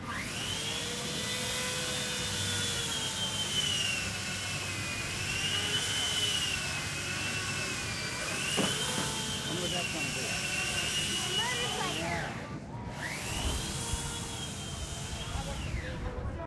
VA State Fair # 6 (Ice Saw)
The sound of electric chainsaw (chainsaws?) carving ice.
crowd, fair, ice, saw, sculpture, state, virginia